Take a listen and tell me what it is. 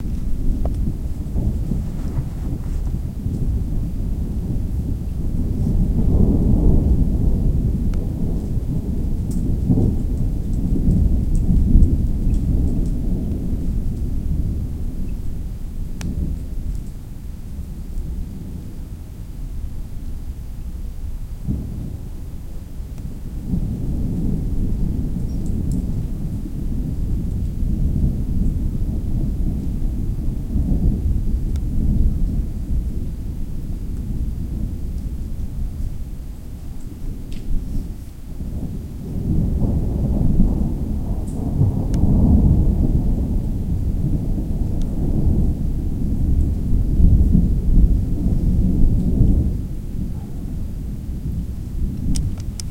Distant thunder storm. Gentle rain and non-stop thunders. Recorded on Marantz PMD 661 MKII built in stereo mics.